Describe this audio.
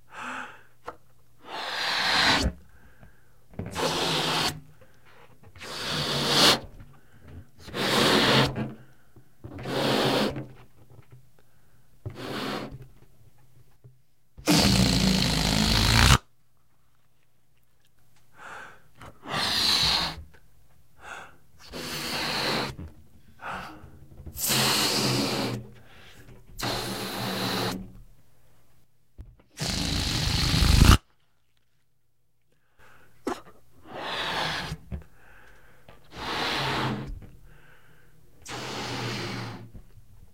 Inflating a medium sized balloon. The air is let out of it a couple of times, so you get a good raspberry sound too.